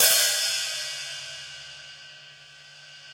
HH13inKZ-BwO~v08
A 1-shot sample taken of a 13-inch diameter Zildjian K/Z HiHat cymbal pair (K-series top cymbal and Z-Custom bottom cymbal), recorded with an MXL 603 close-mic and two Peavey electret condenser microphones in an XY pair. The files designated "FtSpl", "HO", "SO", and "O" are all 150,000 samples in length, and crossfade-looped with the loop range [100,000...149,999]. Just enable looping, set the sample player's sustain parameter to 0% and use the decay and/or release parameter to fade the cymbals out to taste. A MIDI continuous-control number can be designated to modulate Amplitude Envelope Decay and/or Release parameters, as well as selection of the MIDI key to be triggered, corresponding to the strike zone/openness level of the instrument in appropriate hardware or software devices.
Notes for samples in this pack:
Playing style:
Cymbal strike types:
Bl = Bell Strike
Bw = Bow Strike
E = Edge Strike
FtChk = Foot "Chick" sound (Pedal closes the cymbals and remains closed)
multisample, cymbal, hi-hat, 1-shot, velocity